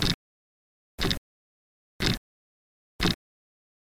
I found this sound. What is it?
cheap chinese clock machine, recorded with SM58